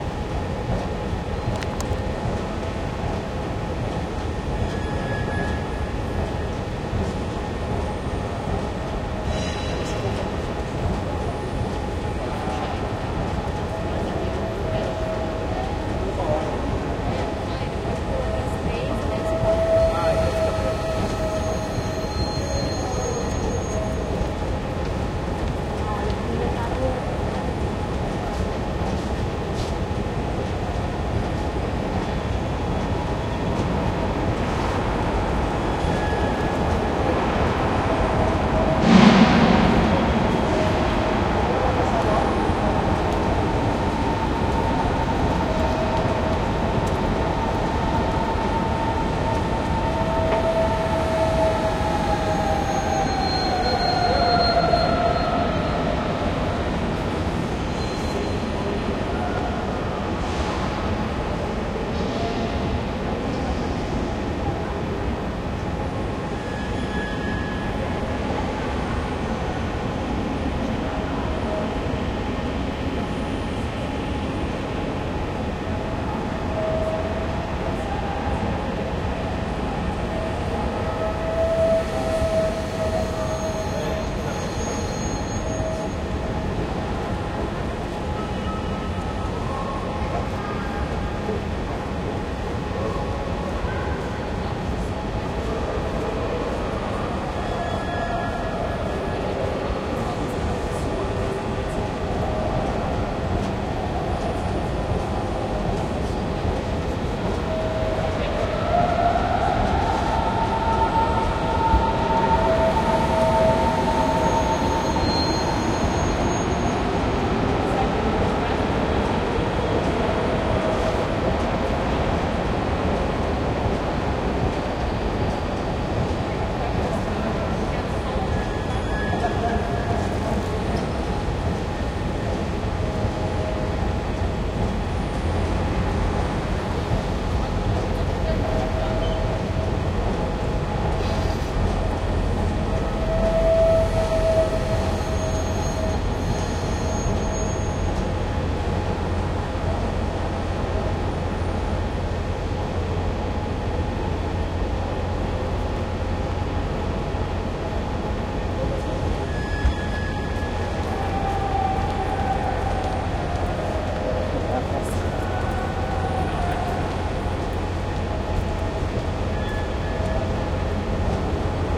Berlin Hauptbahnhof - Night Ambience (Loud)
Loved the creaky ambience of the station as I passed through there at midnight. Listen for the periodic creaks and moans of the machinery.
berlin, city, creaky, field-recording, night, noise, public-transport, quiet, snoring, train